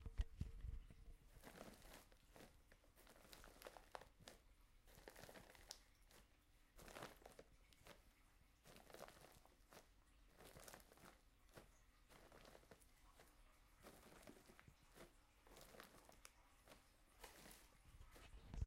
Soft footsteps, like sneaking through the woods. Created by stepping softly on bags of wood pellets.Zoom h1